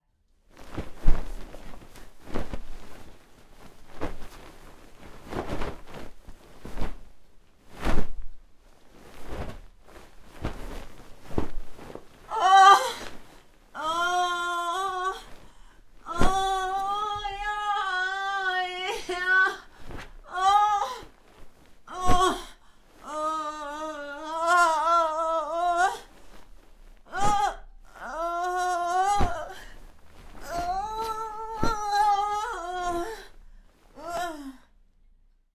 Content warning
Starts with sound of tossing and rolling in bed. Later adds moaning and screaming of a young woman. Recorded for my horror podcast. Mic - Rode NT1-A
bed, blanket, female, human, ill, moans, pain, rolling, scream, sheets, sick, tossing, voice, woman